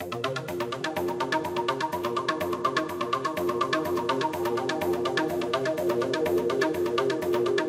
Arpeggio played on the Quasimidi Raven
acid, arpeggio, electro, lead, synth, techno, trance